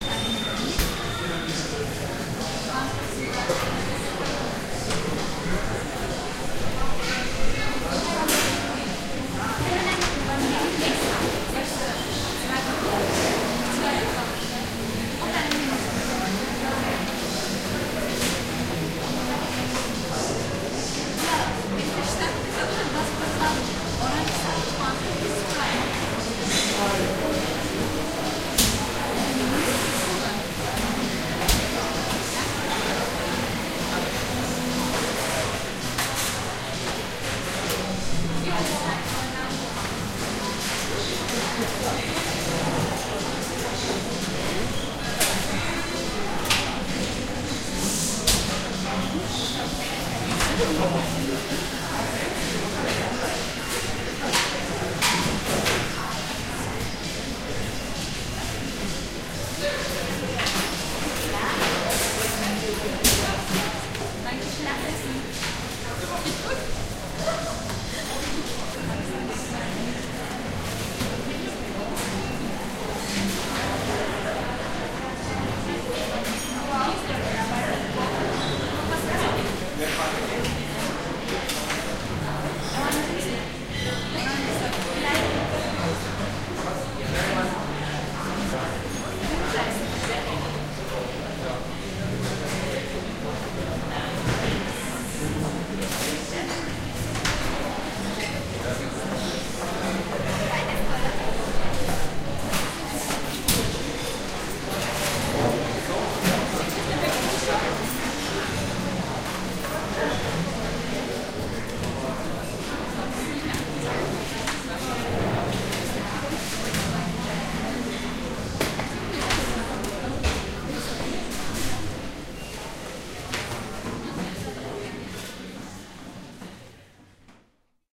Fastfood Restaurant in Vienna, Austria
People in a crouded fastfood restaurant. Indestinguishable voices, loud ambience, no background music. XY recording with Tascam DAT 1998
Loud, Fastfood, Ambiance, Public, Voices, Field-Recording, Restaurant, People, Atmosphere